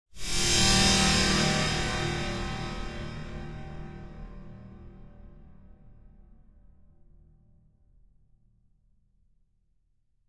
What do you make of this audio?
Horror Cinema 7 2014
After sitting through a couple of hours watching ghost hunting shows I had the sudden urge to create this... Made with NI Prism and processed through Alchemy.
Sorry. No EVP's on this one.